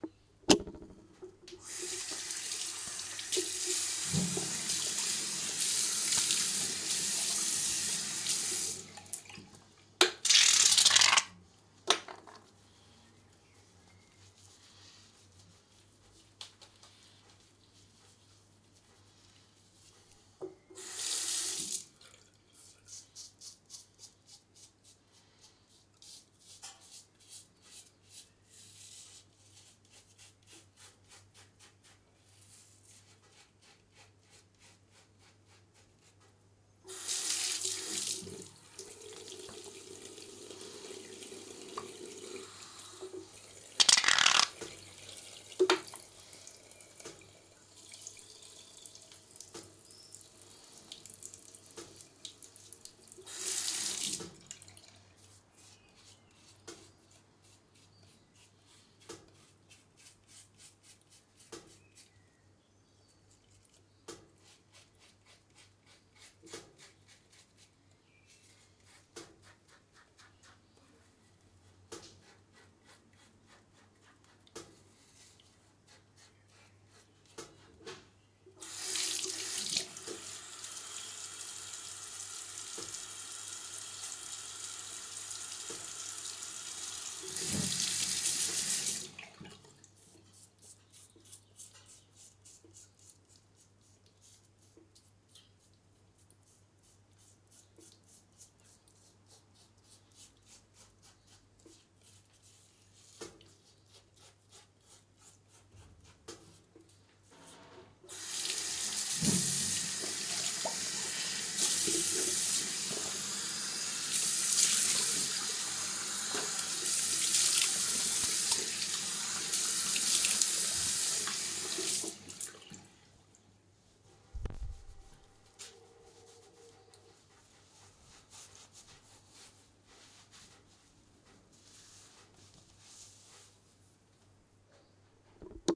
Shave with foam and blades
Recording: Tascam DR-1